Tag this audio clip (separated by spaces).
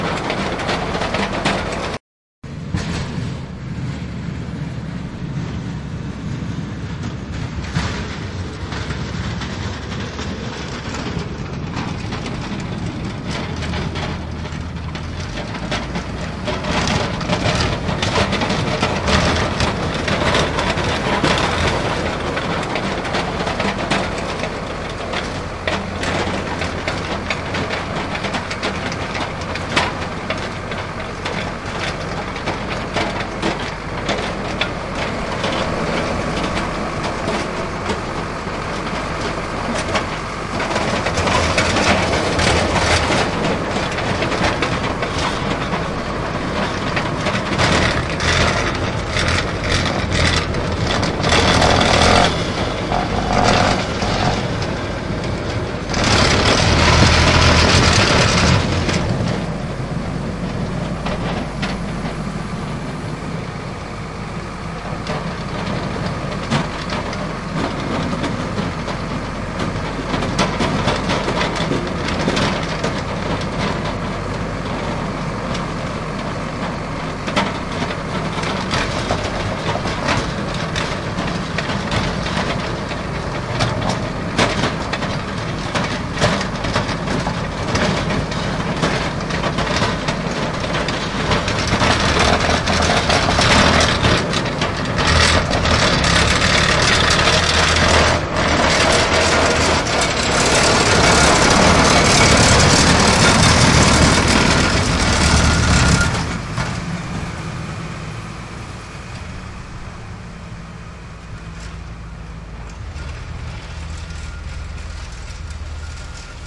plow street snow scrape passby